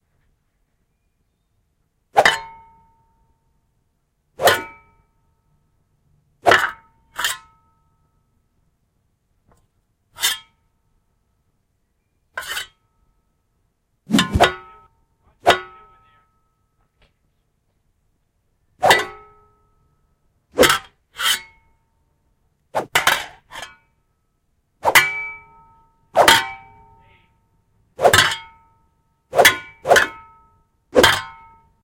Fighting with shovels revisited
Fighting with shovels, this time with pogotron's whooshes.